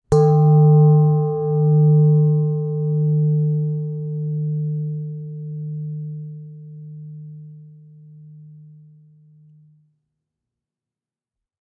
Very simply, a metal salad spinner, pinged by my finger.
bell, hit, metallic